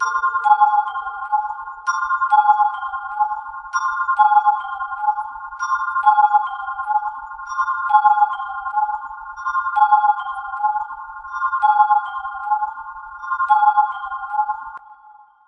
dub bell 008 efxatmo
bell/vibe dubs made with reaktor and ableton live, many variatons, to be used in motion pictures or deep experimental music.
bell, dub, experimental, reaktor, sounddesign, vibe